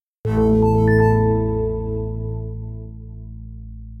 Computer Chimes - Program Start
Please enjoy in your own projects! Made in Reason 8.
program, pc, boot, application, load, windows, mac, chime, startup, interface, computer, start, initiate, game